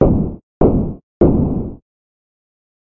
Banging On Door Harldy for Video Games
Made in BeepBox
8bit, bang, banging, door, game, knock, knocking, pounding